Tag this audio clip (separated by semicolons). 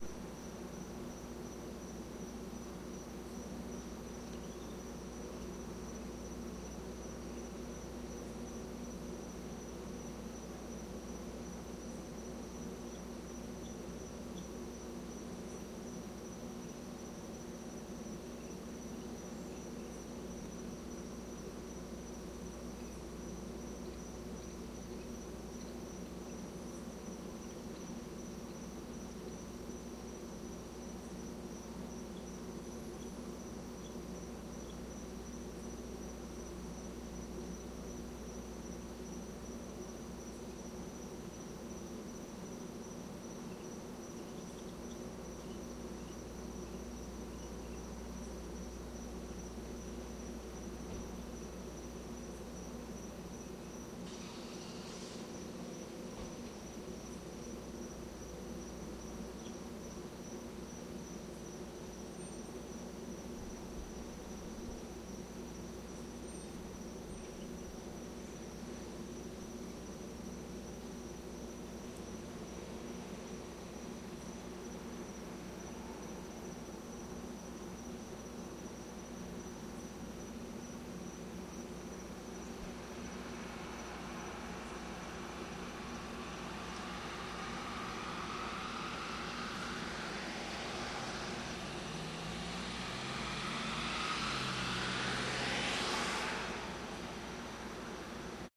chopper; field-recording; helicopter; manhunt; police; search